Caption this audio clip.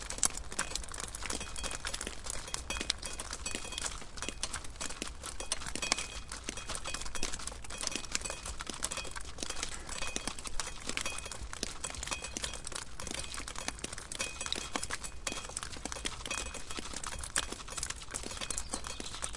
snow-melt on a shed roof, drips from gutter falling onto old rusting car parts.
recorded at kyrkö mosse, an old car graveyard in the forest, near ryd, sweden
drips, water, rhythm, metal, drip, rhythmic, field-recording